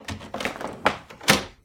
Door-Wooden-Heavy-Open-02
Here we have the sound of a heavy front door being opened.